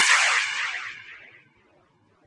More impulse responses recorded with the DS-40 both direct to hard drive via USB and out in the field and converted and edited in Wavosaur and in Cool Edit 96 for old times sake. Subjects include outdoor equatable court, glass vases, toy reverb microphone, soda cans, parking garage and a toybox all in various versions idiot with and without noise reduction and delay effects, fun for the whole convoluted family. Recorded with a cheap party popper